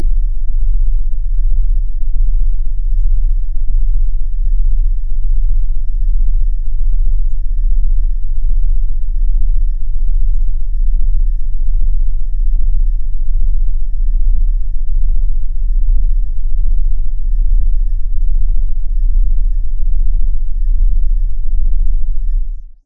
Triple OSC soundshape
Fx: Echo and Phaser.
bass, bug, cavern, dark, odds, shape, sound